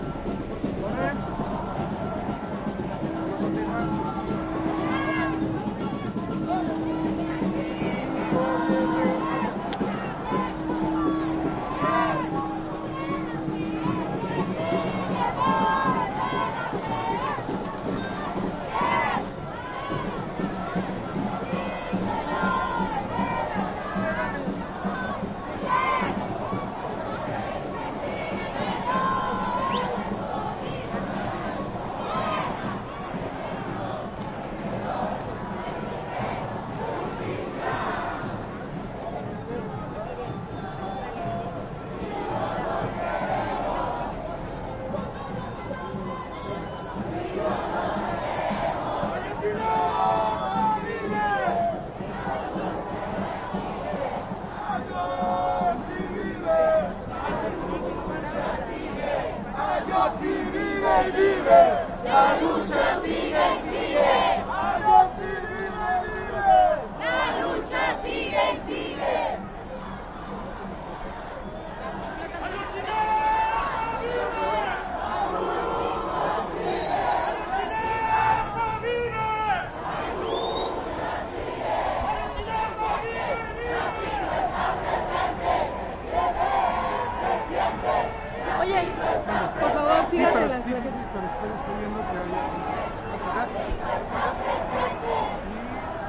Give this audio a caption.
ENTREVISTA-2-T021 Tr5 6
a mob ambient in mexico to commemorate the killed students in 1968... streets, crowd, students, people, mexico, everything in spanish
crowd, protest, people, mob